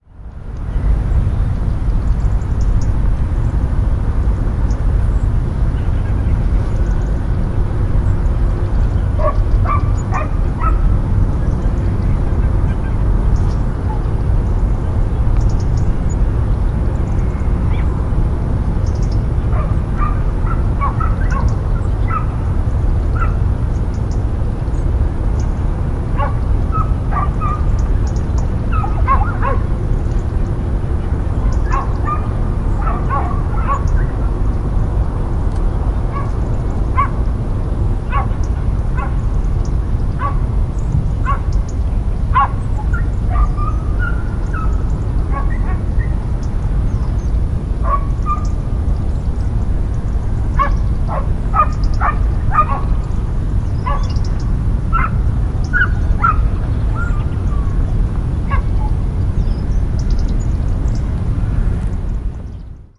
The sounds of a near highway bridge, birds and insects audible and two dogs barking in the distance. Recorded with an Olympus LS-14.